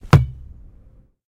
soccer ball hit ground 02
ball hitting the ground
ball, football, ground, hit, soccer